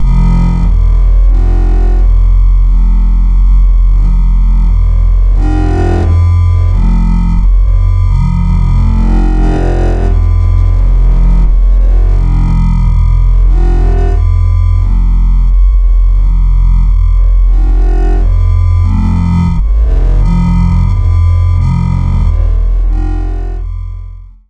Bitcrushed synth bass. Easy to modulate, when chopped and screwed it could easily sound like one of those brostep producers or whatever you meddling kids call it nowadays